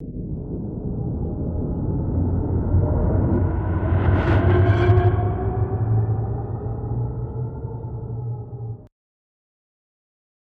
whoosh motron very low
SFX for a sci-fi whoosh.
sfx, whoosh, sci-fi